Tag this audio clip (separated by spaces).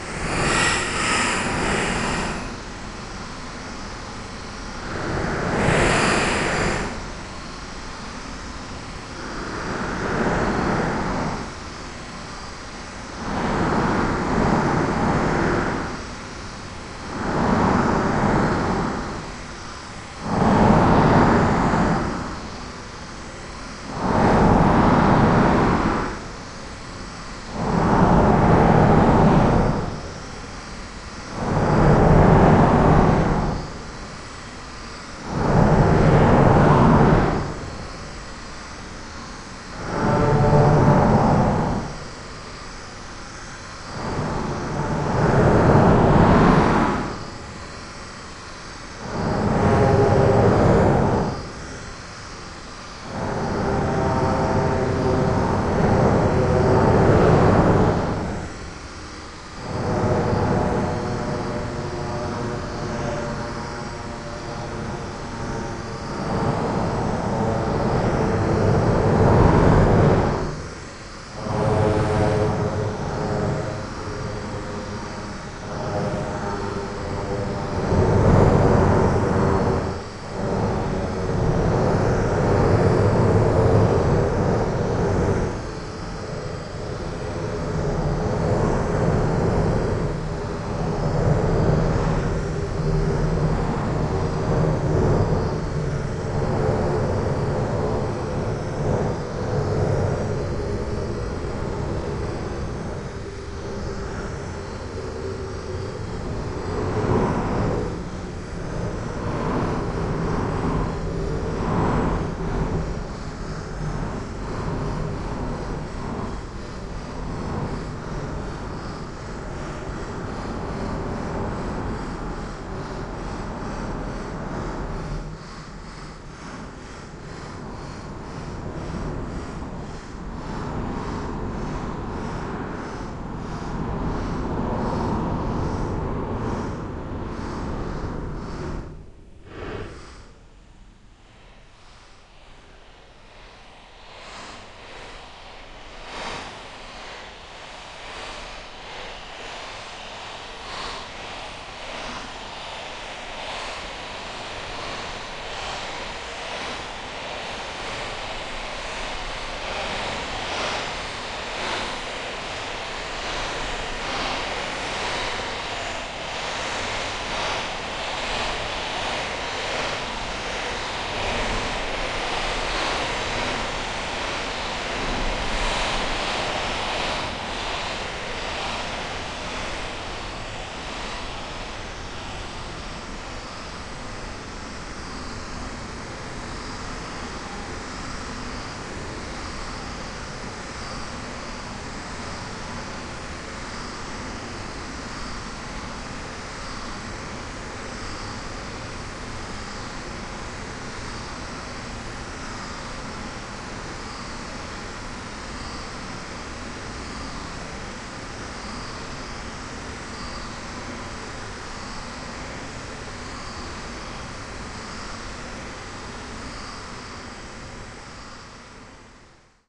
scrape bike rubber-scratch reaper processed-sound tire abelton s-layer spinning SD702